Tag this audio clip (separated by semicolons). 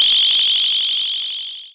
8bit; arcade; beam; cartoon; game; gun; nintendo; retro; shoot; shot; spaceship; video-game